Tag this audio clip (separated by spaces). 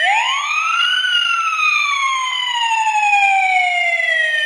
mono siren